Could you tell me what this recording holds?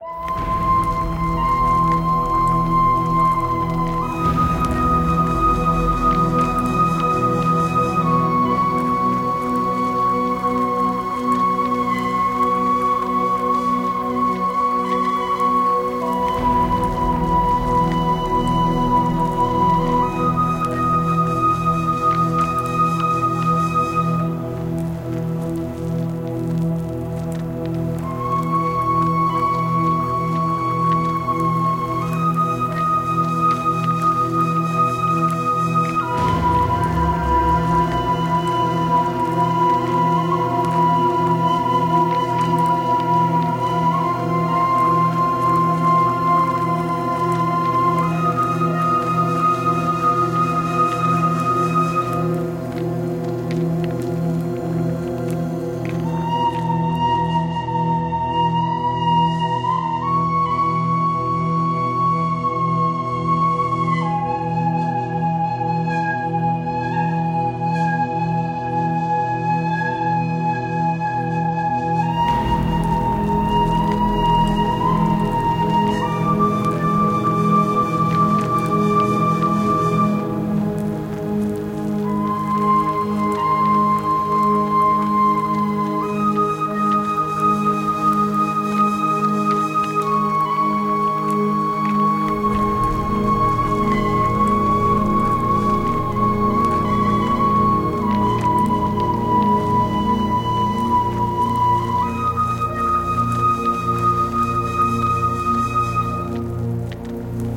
Medidation Spa Chill Relax Flute Irish Whistle Drone Dark Horror Thriller Scary Atmo Amb Cinematic Surround
Amb, Ambiance, Ambience, Ambient, Atmo, Atmosphere, Chill, Cinematic, Creepy, Dark, Drone, Eerie, Environment, Fantasy, Film, Flute, Horror, Irish, Medidation, Movie, Relax, Scary, Sound-Design, Spa, Spooky, Strange, Surround, Thriller, Whistle